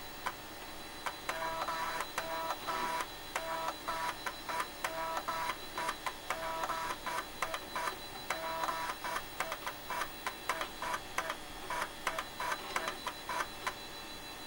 reading floppy disc 2
reading/loading sound of the Floppy drive (version 2)
floppy, loading, floppydisc, reading, drive, floppydrive, disc